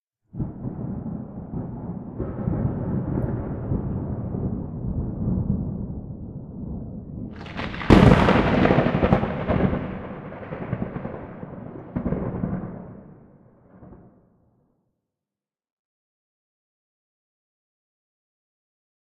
Clean Thunder
Thunder sound cleared from rain. Recorded with zoom h4n.
lightning, thunder, weather